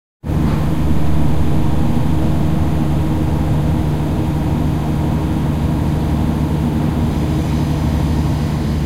an electric generator in the street.
edirol R-1 built-in stereo mic
field-recording, machines